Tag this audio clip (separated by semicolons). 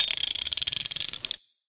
windup; winding-up